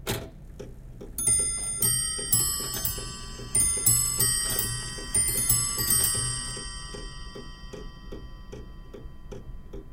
Old Scots Clock - Three Quarters Hour 44.1
18th Century Scottish clock rings the three-quarters hour. This is such a sweet, unusual clock. Recorded with a Schoeps stereo XY pair to Fostex PD-6.